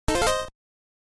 "Alert" Video Game Sound
A quick little sound made in Famitracker to show alert or when something important happens